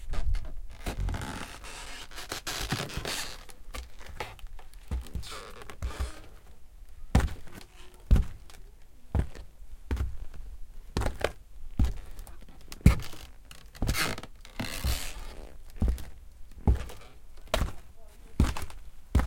knakande trä + fotspår 1
Creaking some tree. Recorded with Zoom H4.
creaking, tree